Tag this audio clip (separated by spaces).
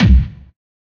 kick hardstyle hard-trance